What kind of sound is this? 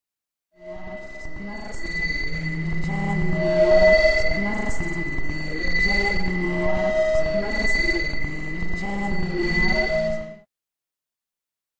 What is she saying - I know she means it she is so insistent but I have distorted her voice too much. Part of my Hazardous Material pack.